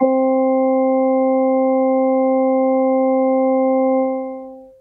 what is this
Casio 1000P Preset - Wah Brass C
Preset from the Casio Casiotone 1000P (1981), C Note, direct recording converted to stereo
brass, Casio, electronic, synthesizer